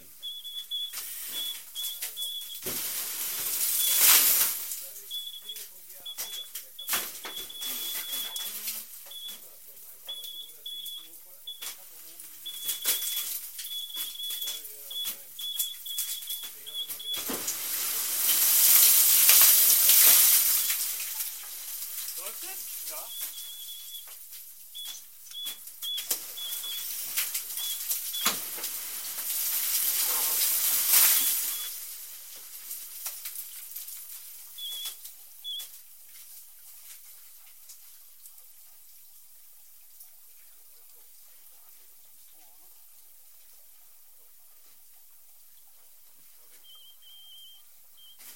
Recorded with an old (mini) NAGRA 1,600 meters underground. This is the sound of "Roof Support" moving, cracking coal,hissing Ventilation, water dripping - and some miners taking in the Background.
Technology, Underground